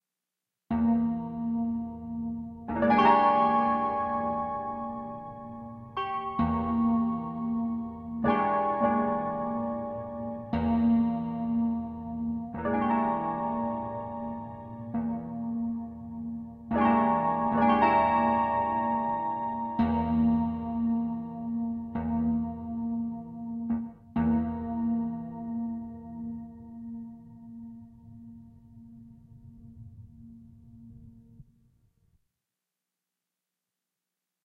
A11 Tekno Taurus E+0
A sound created on a MIDI guitar synth. The sound is created on a Roland GR-33 Sound A11 techno taurus an E+9 chord.
chord, roland, synth, 9, techno, taurus, guitar, e, gr-33